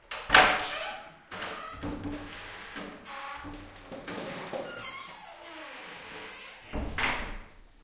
WOOD DOOR 3
slam, door, shut